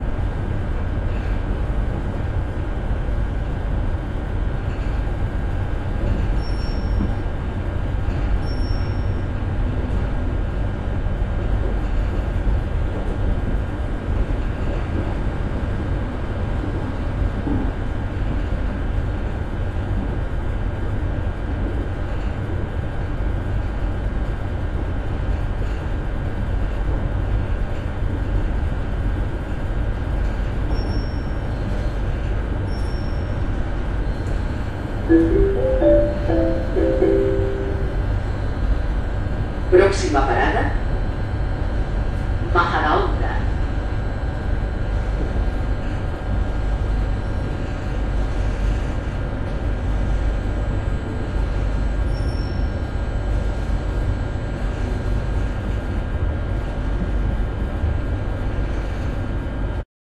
Tren Int. Train Room Tone Inc. Proxima Parada
Esol, Inc, Int, Parada, Proxima, Room, Tone, Train, Tren